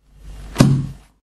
Closing a 64 years old book, hard covered and filled with a very thin kind of paper.
book, lofi, noise, paper, percussive